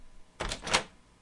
DoorOpen4cs
A house door opening
house, open